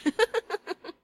softer laughing
Do you have a request?